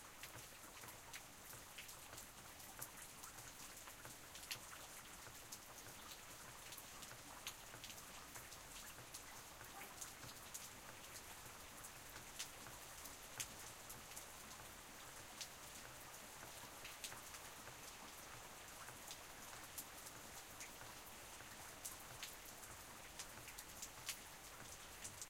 outside-rain-light2-dogbark
Very light rain as heard from outside on my front porch. A dog barks in the background.